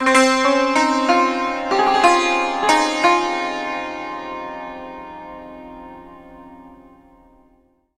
Santur Phrase III
fragment, monophonic, phrase, santur